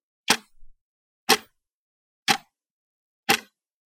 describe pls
Clock Close Mic
A close mic'd tick tock of a clock. Recorded with an NTG2 and H4N, cleaned with RX.
Clock, UI, Tick, Mechanism